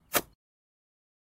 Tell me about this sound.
grass footstep soft 3
Footstep on grass recorded with Zoom Recorder